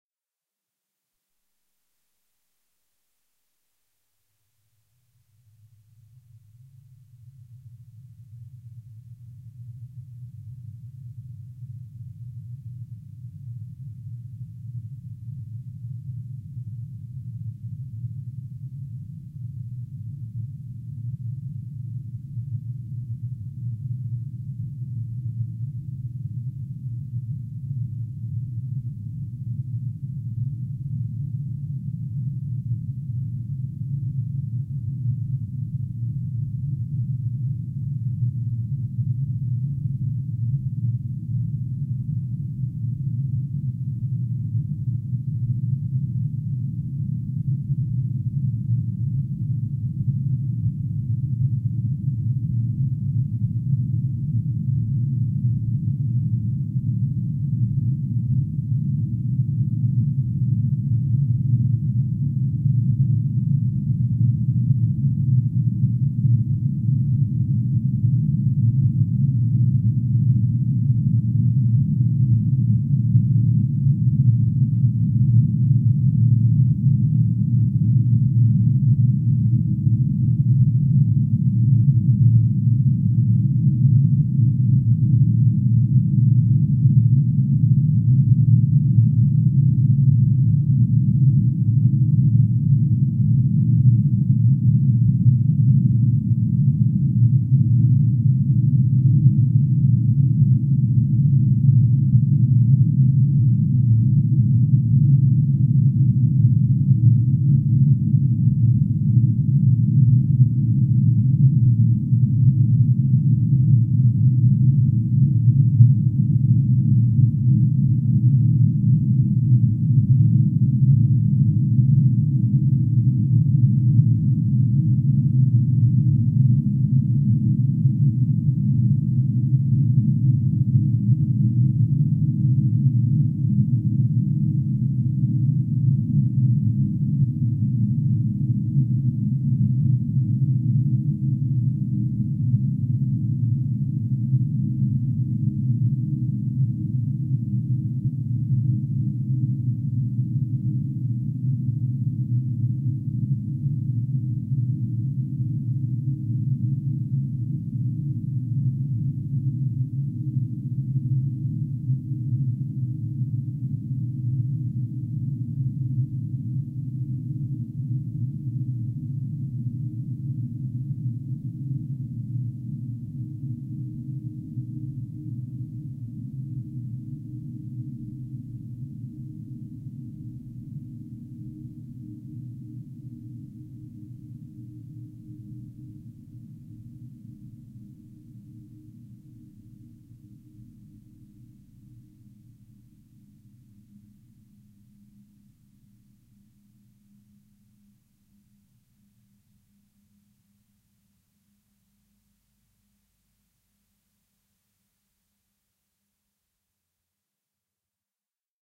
LAYERS 017 - MOTORCYCLE DOOM-37
LAYERS 017 - MOTORCYCLE DOOM is a multisample package, this time not containing every single sound of the keyboard, but only the C-keys and the highest one. I only added those sounds because there is very little variation between the sounds if I would upload every key. The process of creating this sound was quite complicated. I tool 3 self made motorcycle recordings (one of 60 seconds, one of 30 seconds and the final one of 26 seconds), spread them across every possible key within NI Kontakt 4 using Tone Machine 2 with a different speed settings: the 1 minute recording got a 50% speed setting, while the other 2 received a 25% setting. I mixed the 3 layers with equal volume and then added 3 convolution reverbs in sequence, each time with the original motorcycle recordings as convolution source. The result is a low frequency drone like sound which builds up slowly and fades away in a subtle slow way. I used this multisample as base for LAYERS 017 - MOTORCYCLE DOOM 2